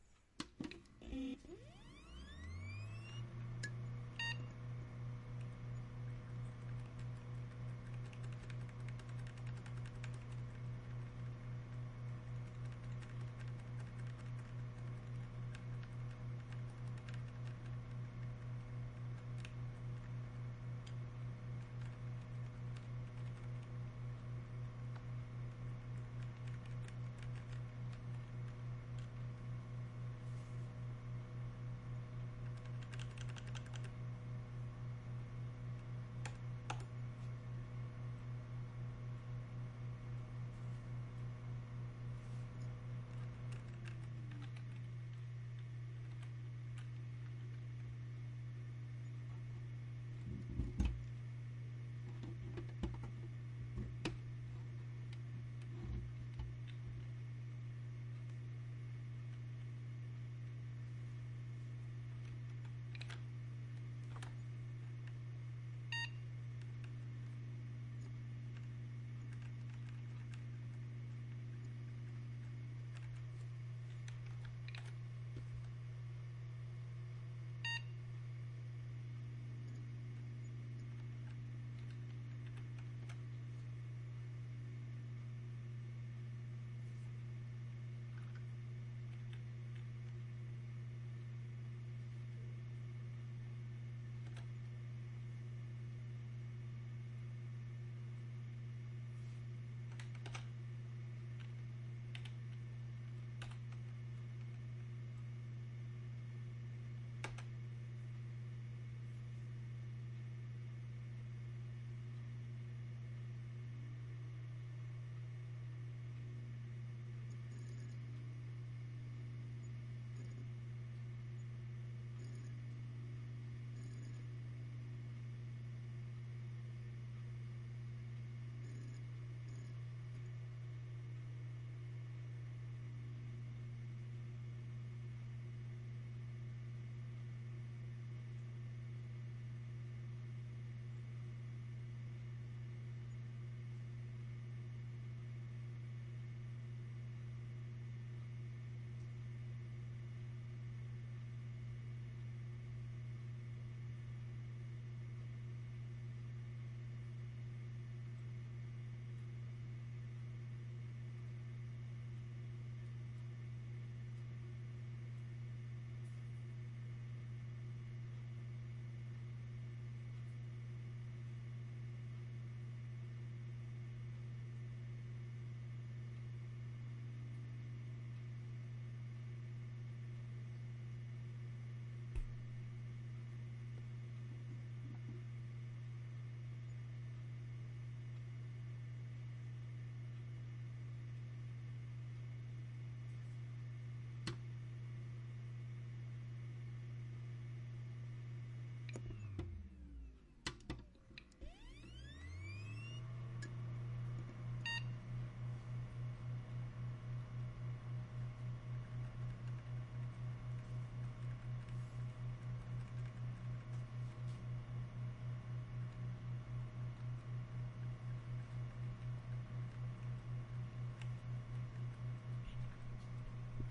This is me using my old PC. The desktop PC was on the floor and the revorder were on top of it. PC cover was open. Recorded with Zoom h1n.